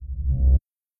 Rollover Low
Slow and low rollover sound
slow, deep, swoosh